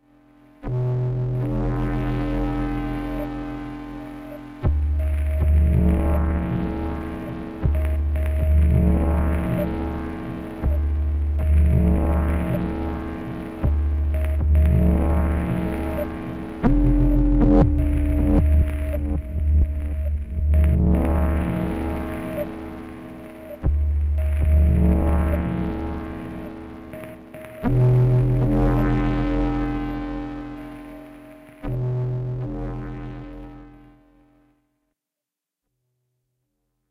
From series of scifi effects and drones recorded live with Arturia Microbrute, Casio SK-1, Roland SP-404 and Boss SP-202. This set is inspired by my scifi story in progress, "The Movers"